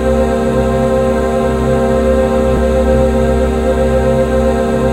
Created using spectral freezing max patch. Some may have pops and clicks or audible looping but shouldn't be hard to fix.
Sound-Effect, Perpetual, Soundscape, Everlasting, Background, Atmospheric, Still, Freeze